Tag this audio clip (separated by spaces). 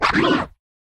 BB Bodyboard Creature Hidden Monster Stretch Surfboard Vocalisation Vocalization Voices